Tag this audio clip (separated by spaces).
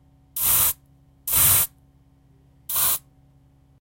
aerosol air bathroom can compressed deodorant gas pressure spray